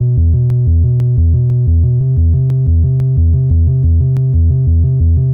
90 Subatomik Bassline 09
fresh rumblin basslines-good for lofi hiphop